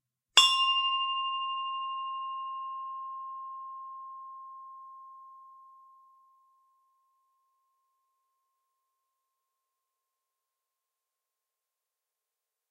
Bronze Bell 1
A stereo recording of a small bronze bell struck with a wooden striker. Rode NT 4 > FEL battery pre-amp > Zoom H2 line in.
bell, bronze, ding, percussion, ring, stereo, xy